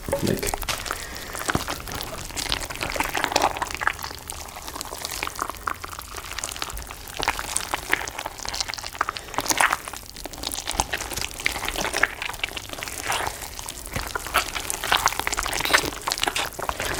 Pumpmkin Guts 5
Pumpkin Guts Squish
guts, pumpkin, squish